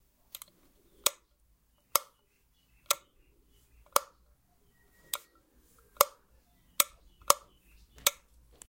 Light Switch
A light being turned n and off. Recorded with a Sony IC.
OWI, Flick, Light, Click, On, Off, Switch